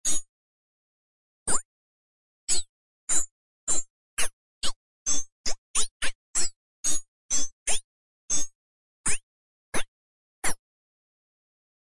STRANGE SOUND
ableton, strange-sounds